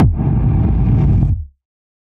A kick with a distorted reverb at the end, sort of sounds like a tomb stone being pushed open
kick, stone